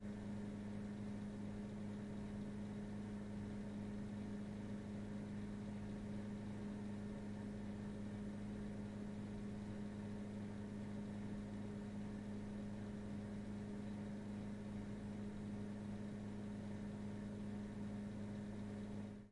Vending Machines - Coffee Machine Hum
Coffee machine humming and buzzing.